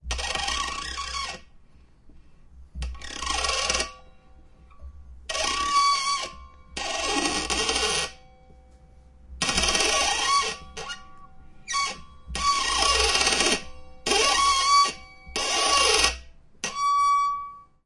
threading a rod
Sounds of threading a brass rod in the workshop.
brass, brass-rod, rod, thread, workshop